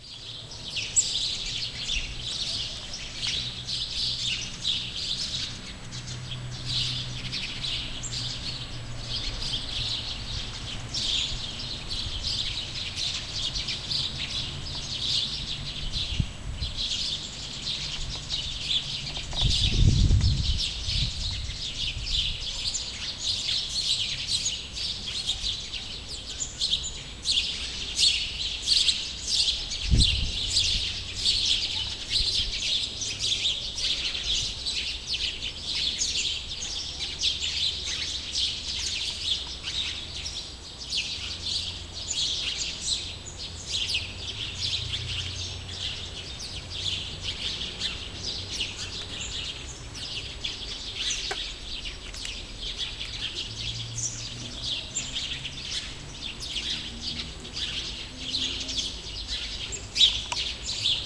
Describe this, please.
Singing sparrows in the yard.
It was recorded with Canon PowerShot S3IS camera at University campus in Nizhyn, Ukraine, Spring 2009.
No software was used for correction.
bird, birds-singing, outdoors, spring